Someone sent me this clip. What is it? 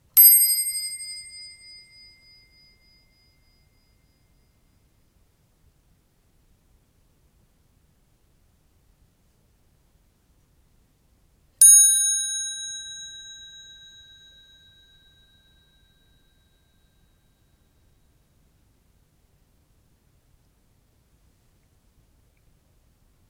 wuc bell high and low
High pitched ding-dong bells of wind-up clock, sounding separately. Cut up, sample and arrange them any way you like.